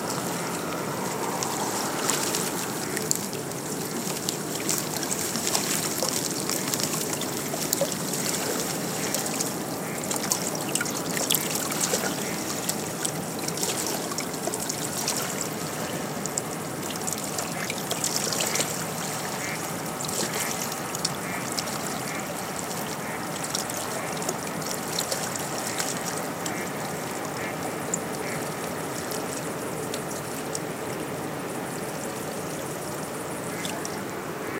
Mariehamn ferryboatwakewashingontoshorelinerocks
Sound of waves from a ferry wake washing onto the rocky shoreline in Mariehamn, on the main island of Åland, Finland.